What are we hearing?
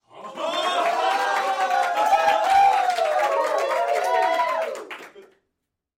Recorded inside with a group of about 15 people.